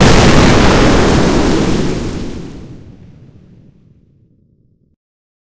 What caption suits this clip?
Boom! This sound sounds very realistic to me. It occurs in the event of a large explosion, as I will use it in Galaga Arrangement Resurrection. Created using OpenMPT 1.25.04.00
blast, bomb, destruction, exploding, explosion, galaga, sound-effect